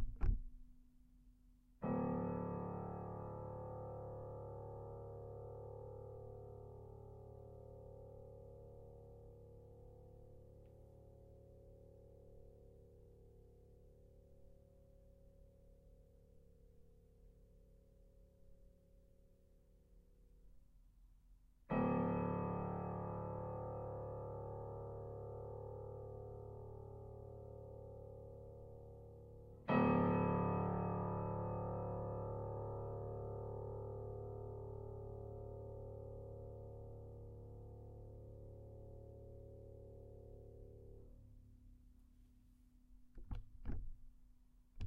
Pianotone dark
piano, dark, pianotone